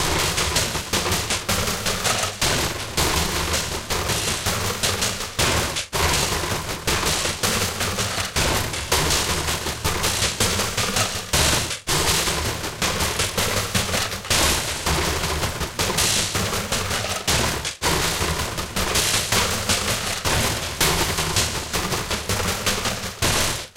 AntonMachines perc loop
240bpm 4/4 percussion loop derived from Anton's excellent "Gritty Machines" sample pack. Used Metasynth and selected a preset pattern.
industrial, beats, motors, 240, 4-4, motor, metasynth, machines, industry, gritty, synthesized, loop, machine, percussion, anton, 240bpm